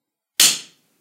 Variation of the first pickaxe sound, less metallic, fuller and shorter at the end.